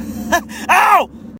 Ha OWW!!
guy, random, dumb, funny, laughing